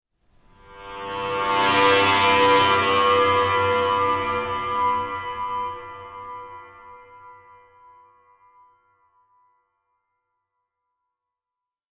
A somewhat desolate sound that always reminded me of a dusty and abandoned road. Made with Prism, and processed through Alchemy.